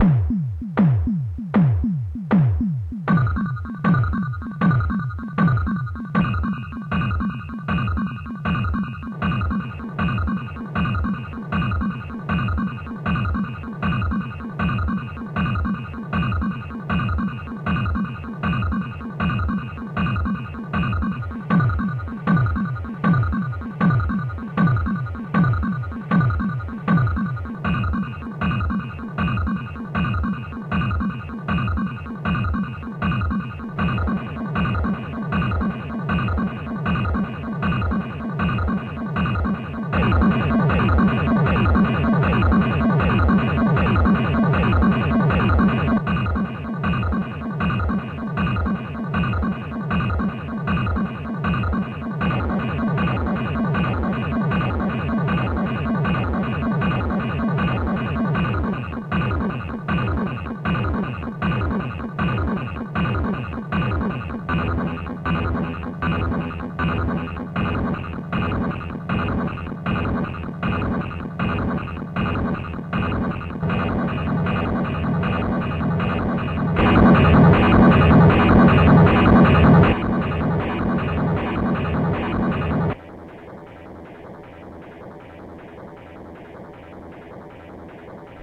various rhyhms
A rhythmic loop. Different bends are activated on the keyboard in time with the loop.
sk-1, rythmic, drum-machine, circuit-bent, lo-fi, casio